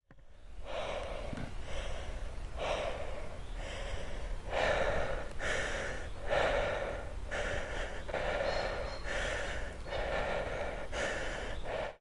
20200620 Women out of breath, in woods
out-of-breath, wood